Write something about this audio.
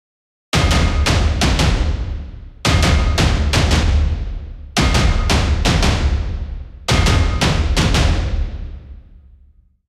Terminator Drums 003 - (170)
Playing drums similar to the Terminator movie. BPM: 170
Film, Terminator, Loop